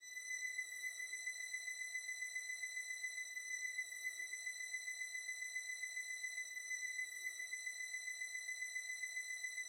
Strings in C# made with DSK Strings VST plugin with Ableton
drone, softsynth, strings